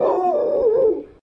Animal Howl 1
Recorded using a zoom h2n recorder. Dog howling. Edited in audacity.
animal, dog, howl